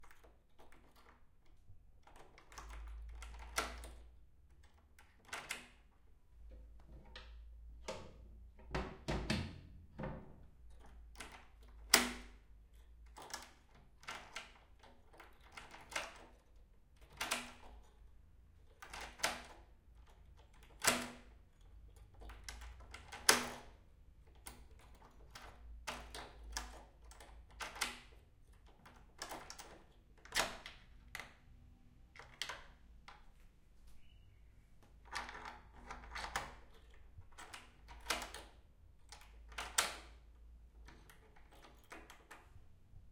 door wood int lock unlock deadbolt with old key on and offmic
wood, key, lock, unlock, door, deadbolt, int